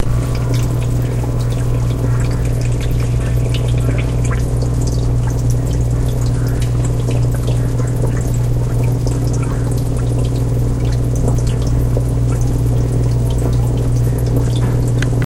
SonyECMDS70PWS fishtank
field-recording, test, microphone